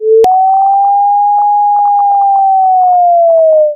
Made in Sfxr with pitch slides and changes, then slowed in audacity